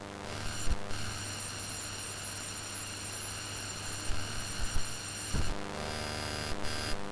Train On Synthetics
ther, fx, crackle, soma, train, screech, noise, non-processed